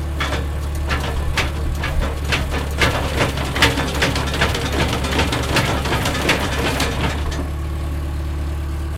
JCB Bucket Rattling
Buzz, electric, Factory, high, Mechanical, medium, motor, Rev